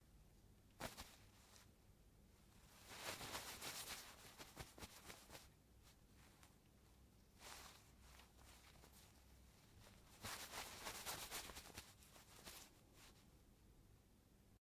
RUBBING A DOG CsG
dog, rub